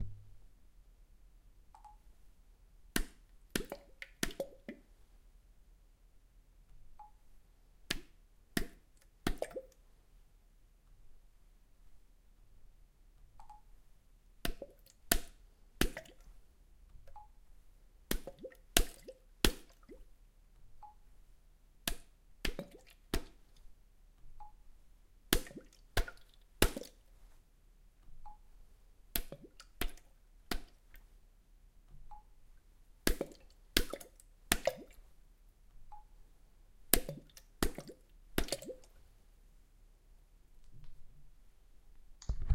Bucket Splash Close
Bucket, Splash, Water